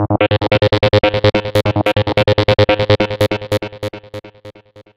Psy Trance Loop 140 Bpm 07
Trance, Psy, goa, psytrance, Loop